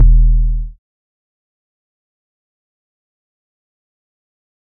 - ALIEN KIT MODBD E 1
Here is my first drum kit pack with some alien/otherworldly bass drums. More sounds coming! Can use the samples wherever you like as long as I am credited!
Simply Sonic Studios
samples, synth, electronica, drum, modular